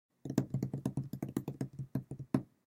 Sound of plane running to take off.
run, plane, takeoff